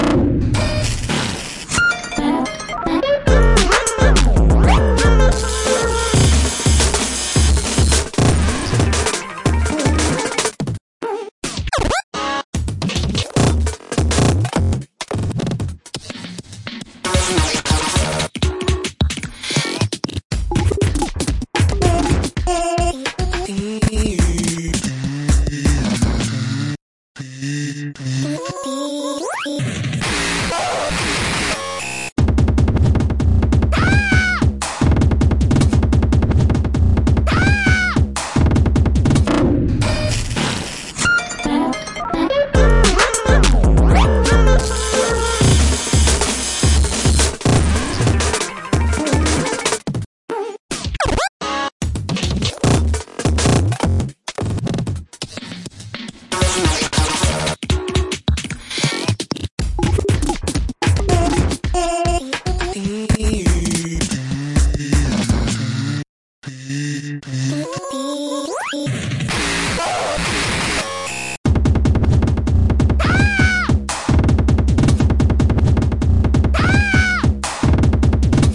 random sound collage to build samples up